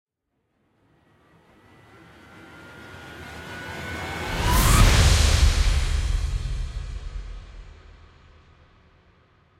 Scary Hits & Risers 002
cluster, effect, freaky, fx, hit, hollywood, movie, riser, scary, sound, sounddesign, soundeffect